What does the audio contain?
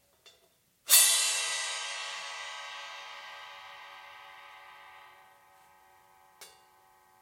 cymbal key scrape
Recorded using some Zildjian cymbals and an overhead mic. Recorded at a lower level to give the user plenty of headroom
drums, cymbals, percussion